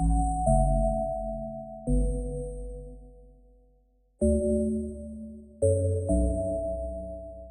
mystical melodic gling, computer music box
mystical, box, melody, gling, music, glang, music-box